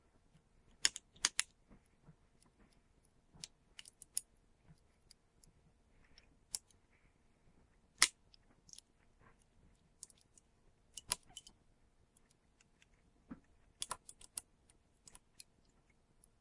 Crushing soda can 06
Me crushing a soda can with a seat clamp.
can, soda